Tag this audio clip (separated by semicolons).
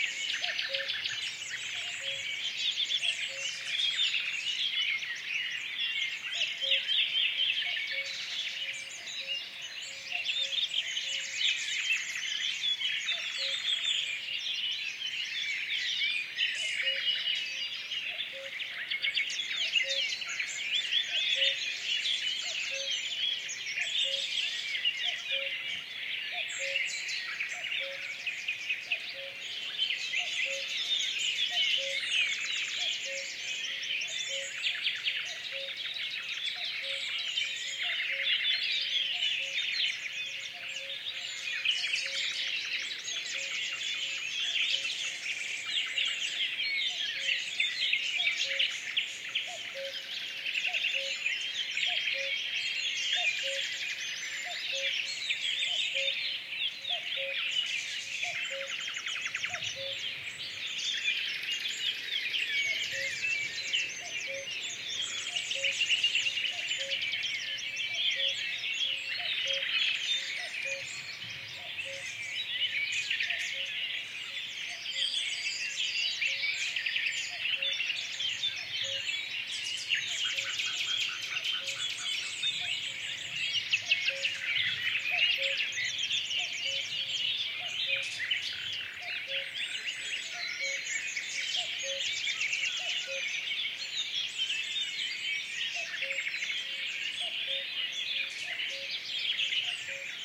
ambiance; field-recording; nature; spring